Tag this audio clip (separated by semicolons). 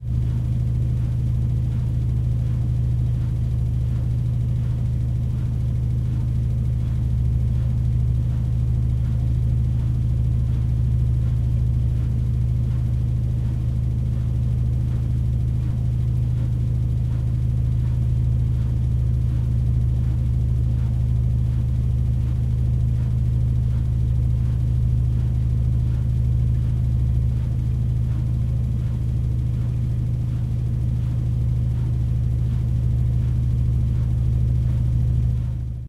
household; machines; washing-machine